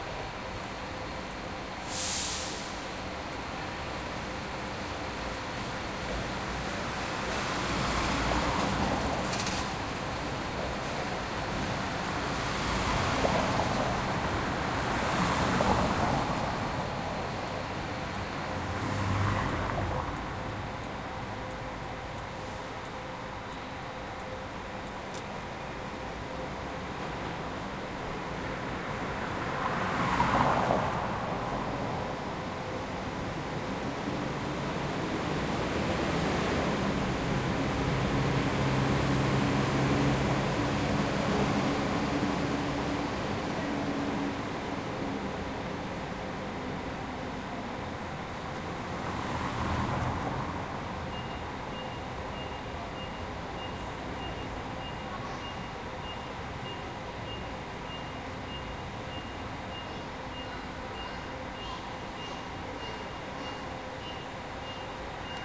Bus leaving and passing cars